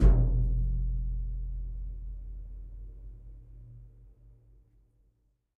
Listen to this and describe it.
Metal hit low big container medium